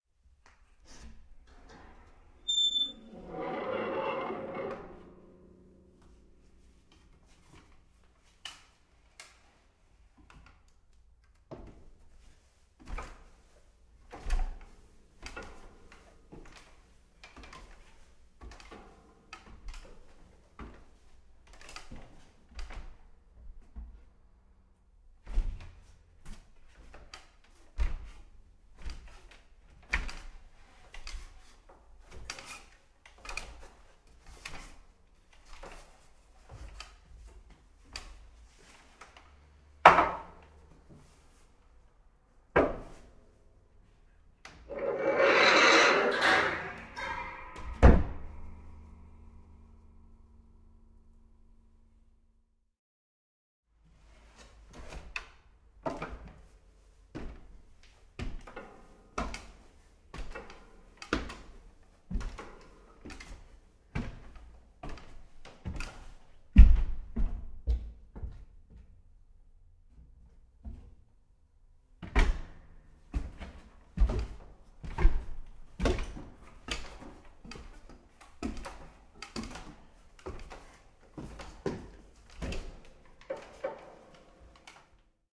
opening and closing a loft door
opening a big loft door from the ceiling and unfold the wooden ladder. climbing slowly upstairs and downstairs, folding the ladder again and closing the squeaky door. a second version of climbing upstairs width man shoes is included at the end